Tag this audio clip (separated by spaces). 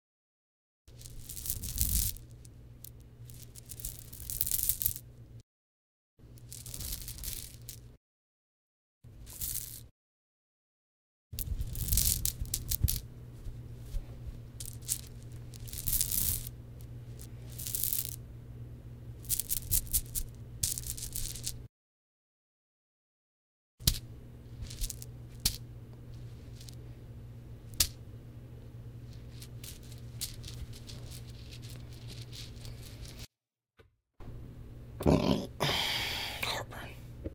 Diamonds-in-a-bag Diamonds pouring-diamonds steel